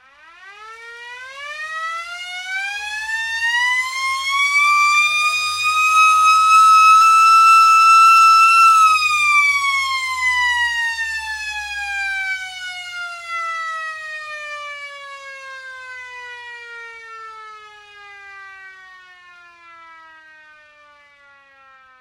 ALARME WAR
Real War Siren recording in side an container use mic shure K2 and audio interface M-audio.